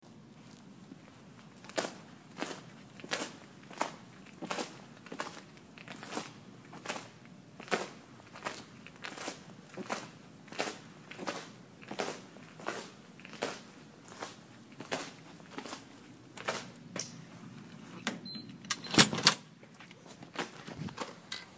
Sticky Footsteps + door opening with beeping sound.
This is a recording of me walking with shoes on a very sticky floor. There is the sound of me opening a FOB-operated door at the end of the waveform - might be useful for something, as well.
door, feet, floor, fob, footsteps, shoes, sticky, walking